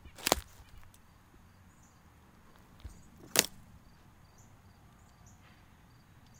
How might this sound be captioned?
Twig Snap
Stepping on a twig and snapping it
Snap, Stick